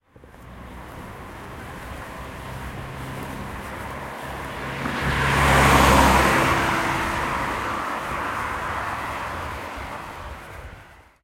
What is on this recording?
Car Drive By Fast
A sample of a car driving by in the late evening on a wet road. Captured with a Zoom H5 recorder and a standard LR small diaphragm condenser microphone, normalized.